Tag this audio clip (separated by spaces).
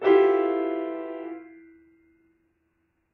discord note music piano instrument